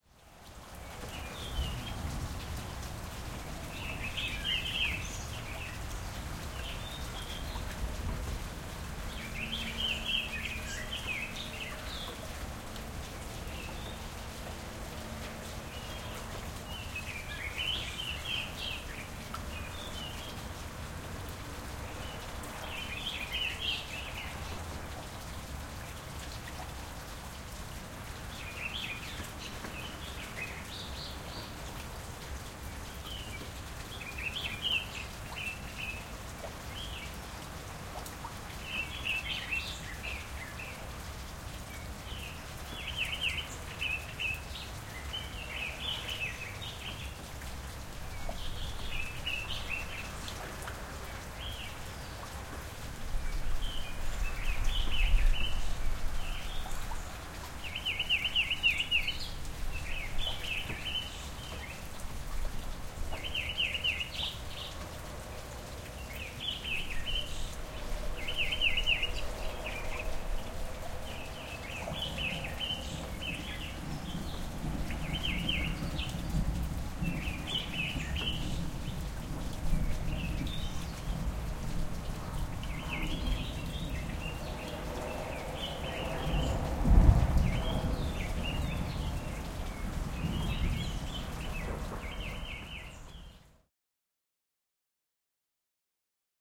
early morning rain thunder and birds 01
this is part of a series of rain and thunder sounds recorded at my house in johannesburg south africa, using a zoom h6 with a cross pair attachment, we have had crazy amounts of rain storms lately so i recorded them with intent of uploading them here. a slight amount of eq has been applied to each track.